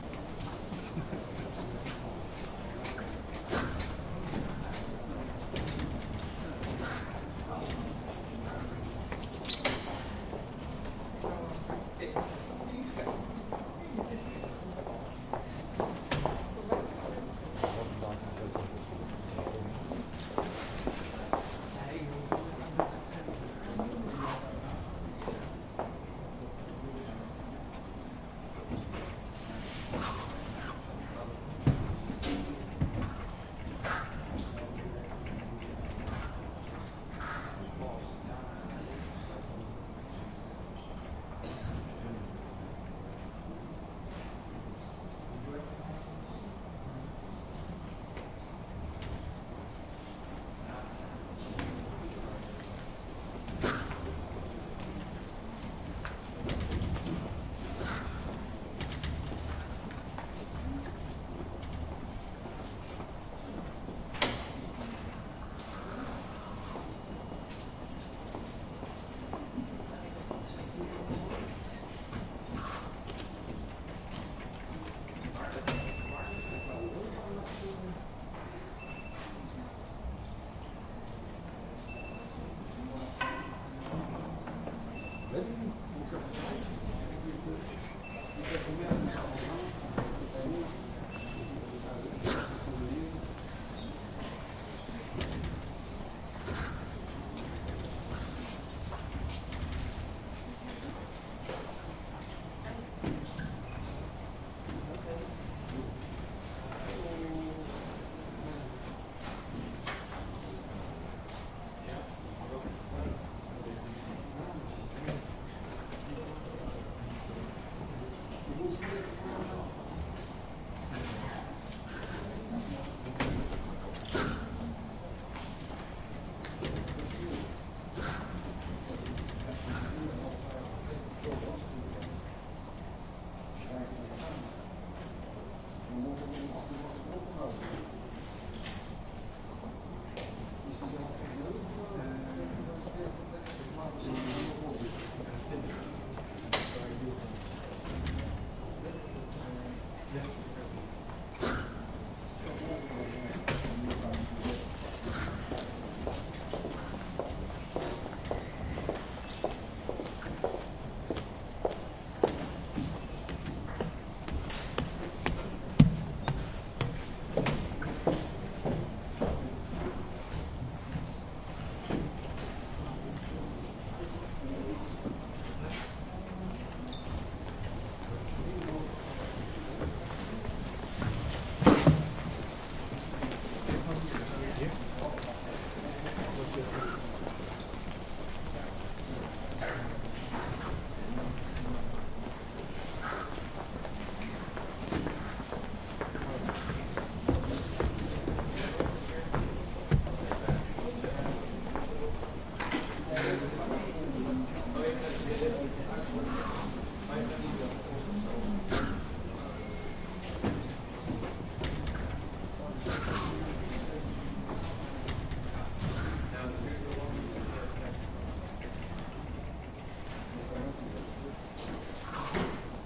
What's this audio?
20121112 TU Delft Library, stairs - ambience in front of entrance door
The library at Dutch university TU Delft. Recorded close to a staircase in front of the entrance door. Footsteps, dutch voices, beeps and door sounds. Recorded with a Zoom H2 (front mikes).
ambience, big-space, field-recording, library, netherlands, neutral, public-building, university, zoom-h2